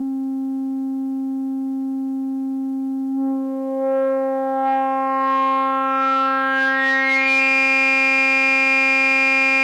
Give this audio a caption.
Long Sustain/LFO
C1 recorded with a Korg Monotron for a unique synth sound.
Recorded through a Yamaha MG124cx to an Mbox.
Ableton Live
korg, Monotron, sfx, sound